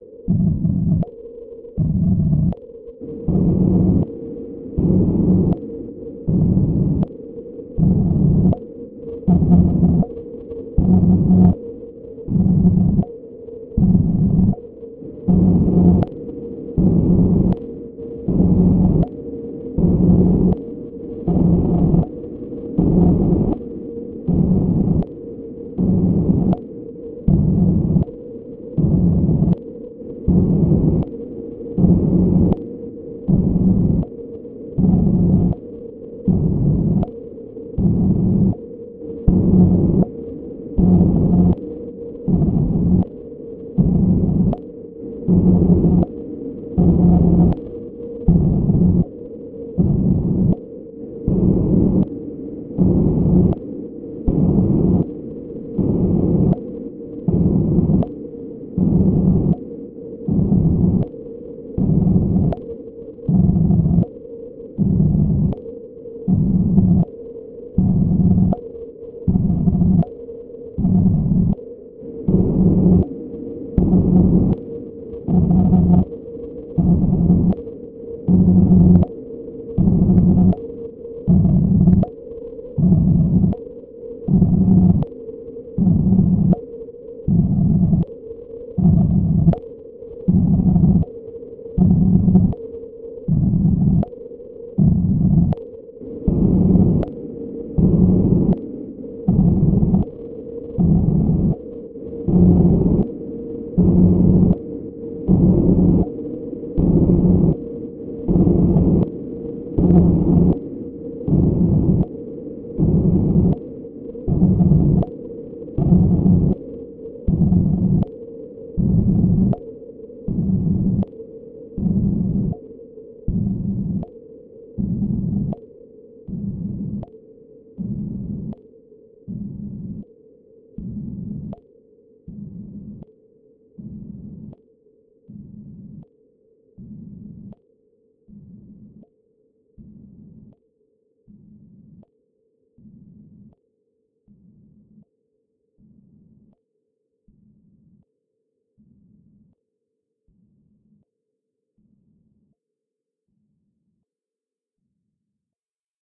Noise bursts created with a slow stepping random LFO with some delay and distortion. Created with RGC Z3TA+ VSTi within Cubase 5. The name of the key played on the keyboard is going from C1 till C6 and is in the name of the file.